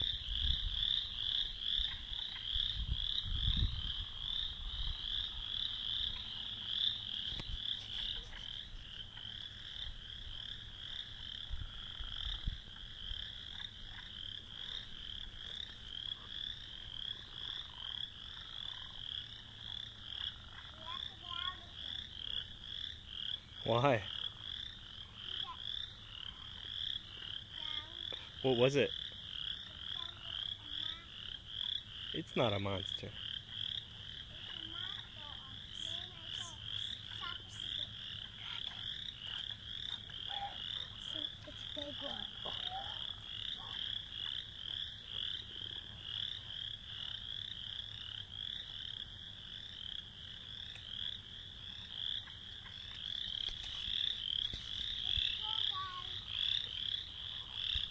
frogs at crosswinds marsh preserve
michigan, marsh, frogs